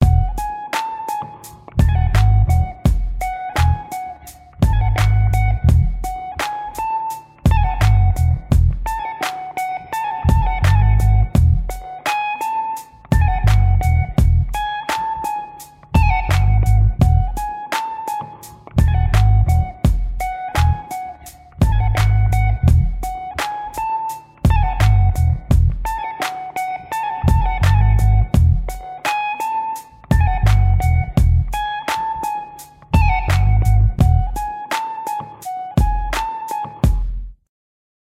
A blingy hip hop beat with drums, base and guitar.